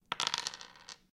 Two D6 rolling on a table